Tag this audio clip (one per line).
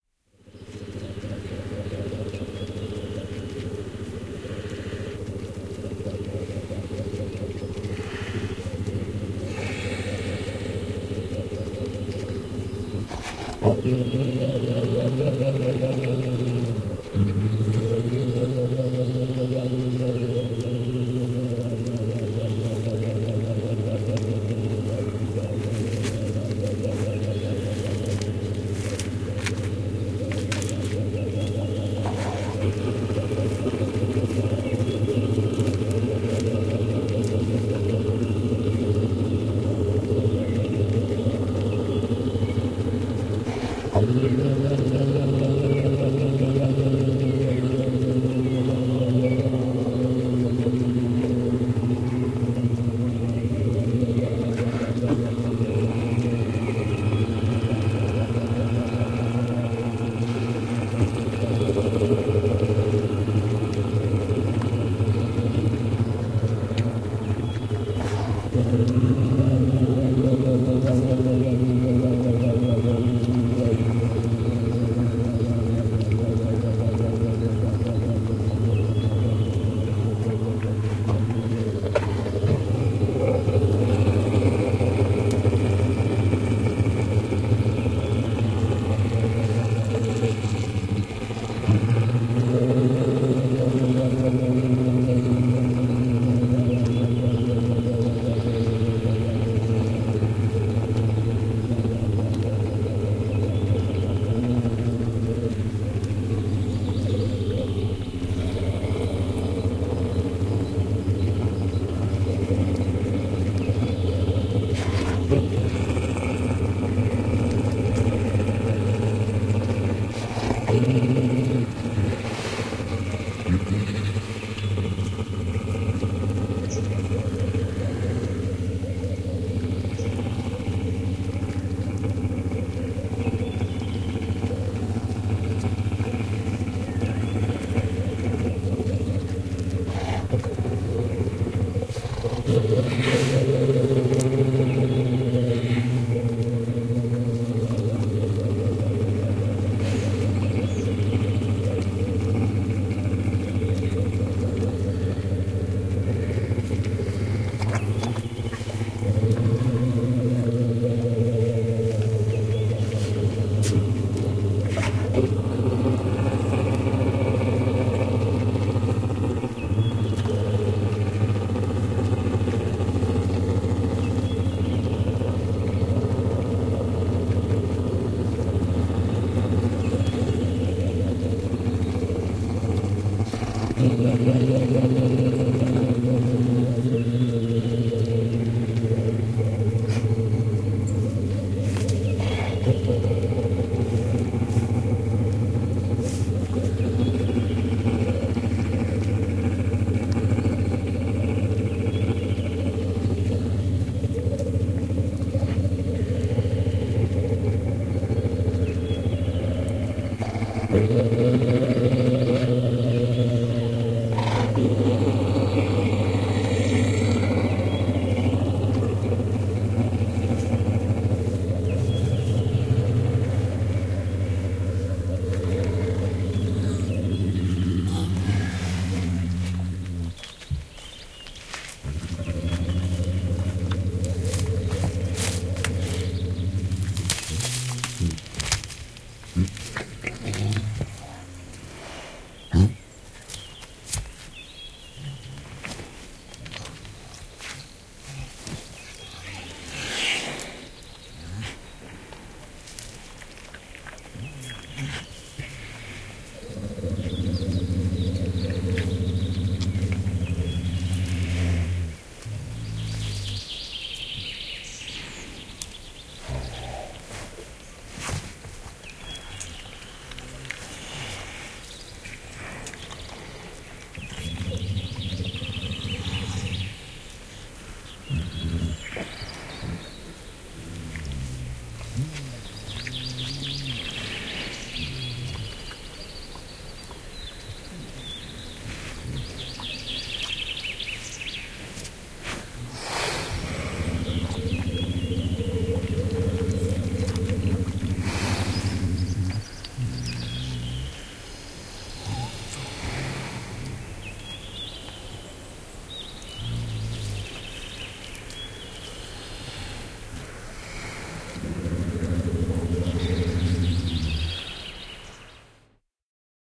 Soundfx
Wild-Animals
Yle